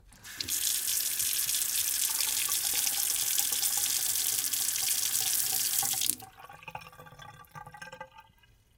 sink water 1
turning the faucet on and off in my sink